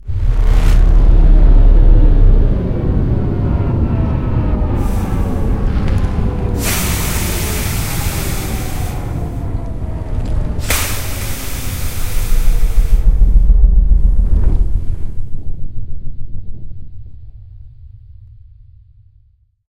Powerdown (Big Machine)
My goal with learning sound is creating immersive soundscapes and imaginative moments. I want to create fantastic art, and I can’t reach the peak of my imagination without help. Big thanks to this community!
Also, go check out the profiles of the creators who made and recorded the elements of this sound:
Engine, engine-fail, Powerdown, Reactor, Ship, Spaceship